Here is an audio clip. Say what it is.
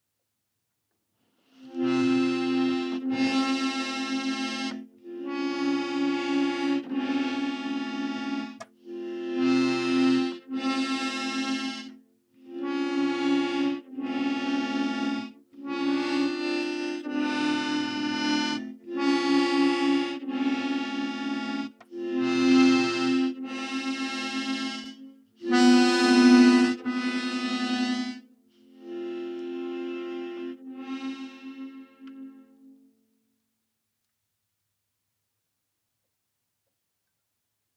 This is a very old, out of tune accordian (the tuning wavers way off key on the main notes as it plays). It might be useful for messing around with to create undertones for a horror or mystery with a rise-fall pattern.
I recorded it on an Se X1 large condenser mic.